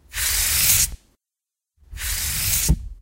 Persephone door open close
An automatic door on a run-down spaceship. Door opens and closes.
Created in Audacity.
close, open, science-fiction, door, sci-fi, automatic-door